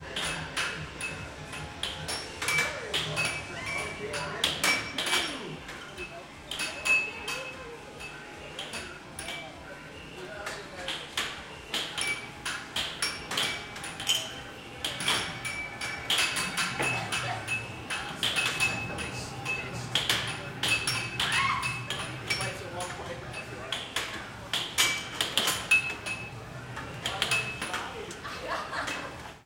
Four people playing air hockey in the arcade zone in aiport. Distant version. Some human speech. Loud noise of arcade machines.
This recording was made in Manchester Airport, UK as a part of my project for Location Sound module in Leeds Beckett University.